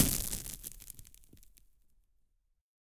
Stretch noise with percussive envelope
I stretched out a dried up elastic band en gave it a percussive envelope. Interesting for Convolution techniques.